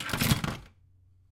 kitchen utensils 06
rummaging through a kitchen drawer
recorded on 16 September 2009 using a Zoom H4 recorder
cutlery, kitchen, rummaging, silverware